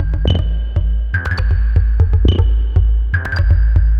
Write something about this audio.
BS Electricity Bass 2
Dark and raw minimal and techno bass loop (120 BPM)
Acid, Bass, Dark, Loop, Minimal, Techno